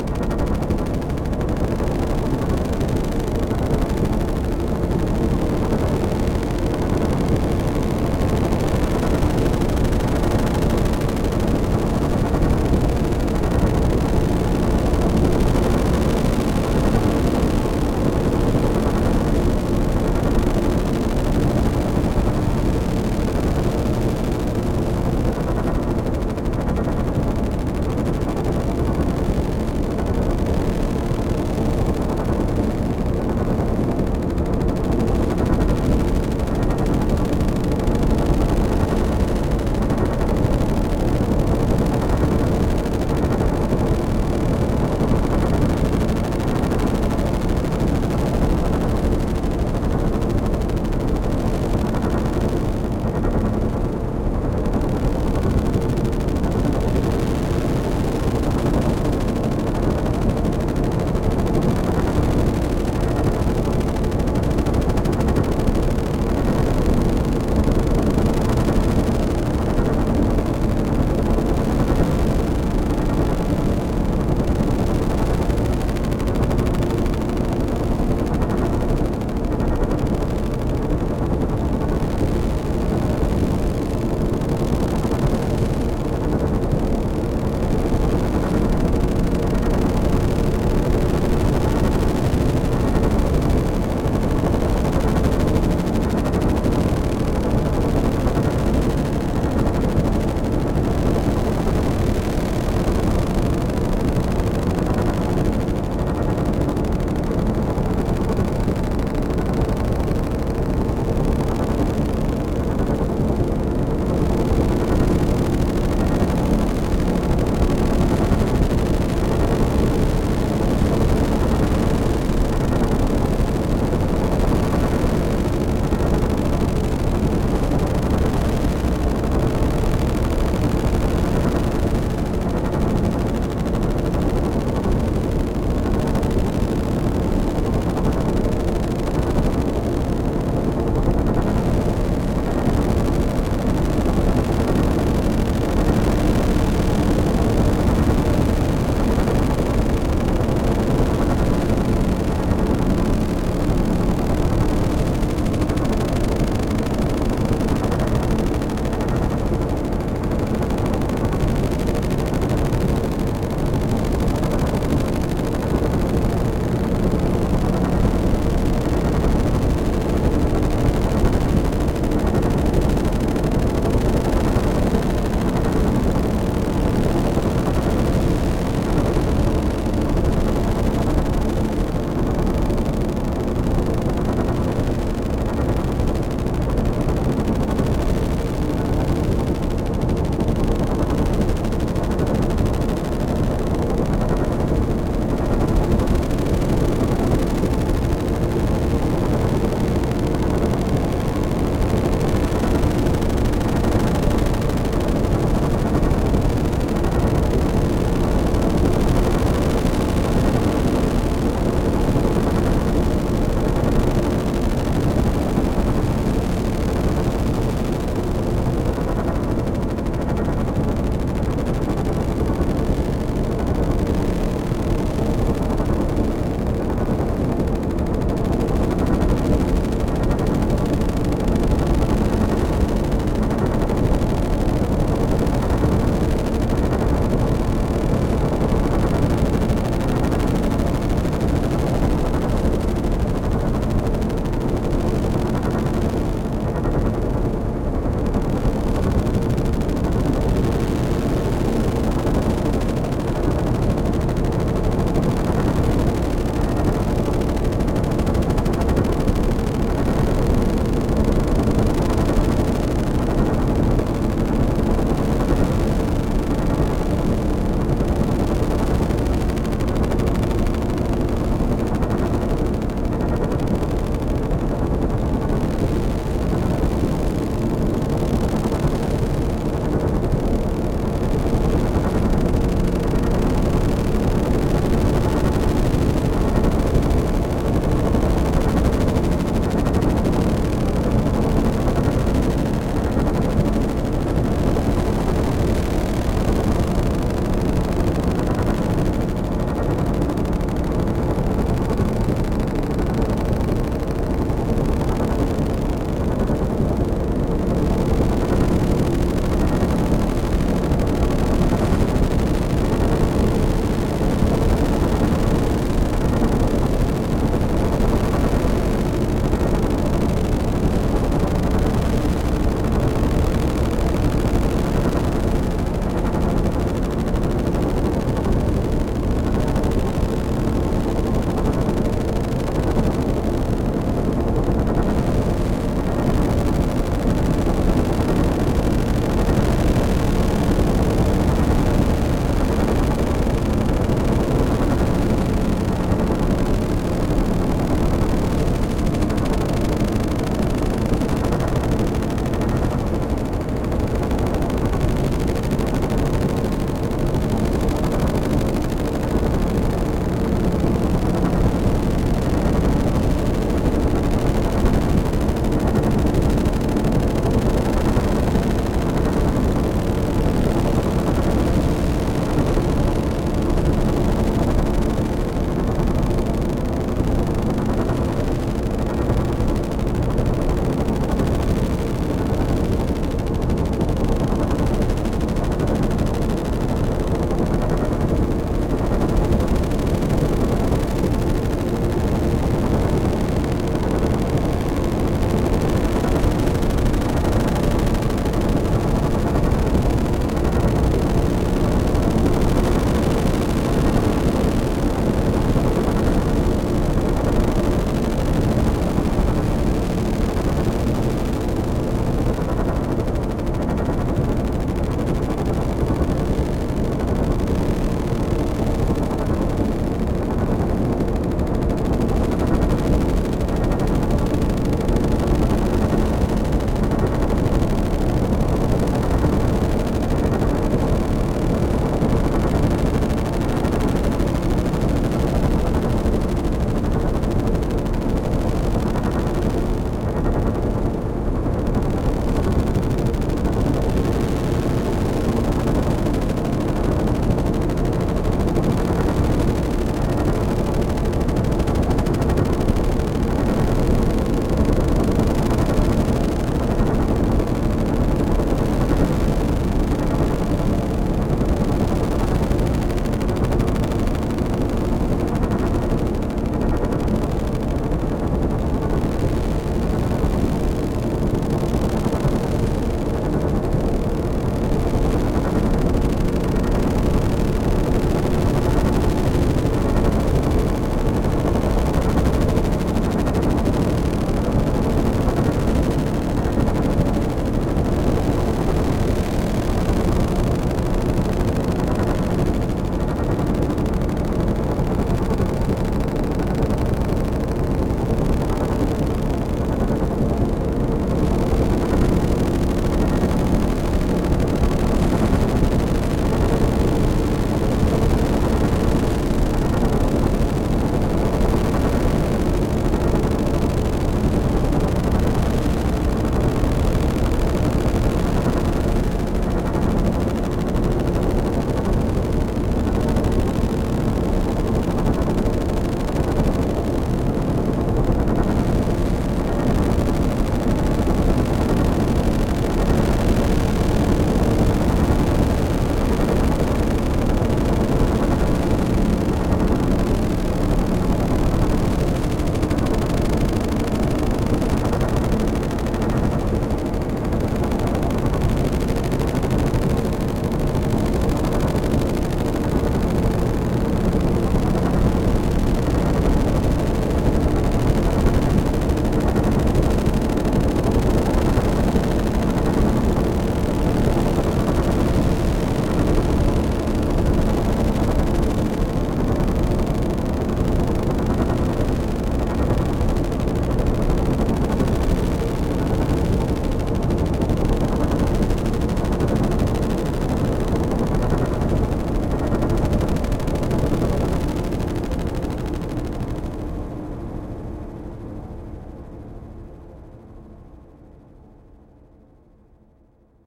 Fragment of a score compiled using time stretched household sounds, overlaid and effected to achieve sympathetic, overtones and undertones.
Audacity and a Macbook Pro.
drone, soundscape, score, rattle, effect, ambient, bass, ambiance, deep, pad, suspence, atmosphere, stutter, backdrop, dark, medium, pulse, sci-fi